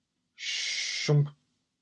A vocal effect made by me. Appropriate for a character getting stuck in a pipe or a chimney, but I'm sure you can find other uses for it. Credit's nice but optional.
cartoon, comedy, shoomp, thump